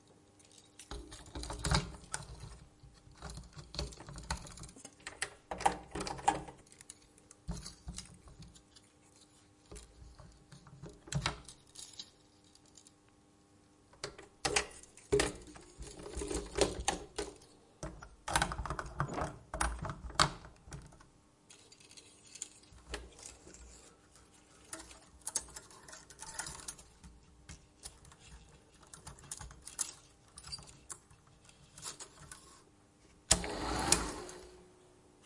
Super 8 mm settingup-projector
Sound recording of a real super8 mm projector being installed, running the filmstock through it by hand.
8mm, cinema, film, hand, install, load, movie, project, projecting, reel, rhythm, s8, silent-film, stock, super8, vintage